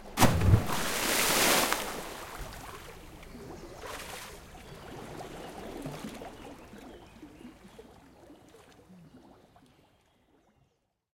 5 meters dive
someone is diving from the 5 meters diving board.
Big splash and water movements.Outdoor pool, birds around.
France, 2012.
Recorded with Schoeps AB ORTF
recorded on Sounddevice 744T
diving, dive, water, pool, diving-board